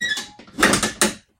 Door-Garage Door-Handle-03
This sound comes from a garage door lock being turned.
Door, Garage, Handle, Lever, Metal, Switch, Turn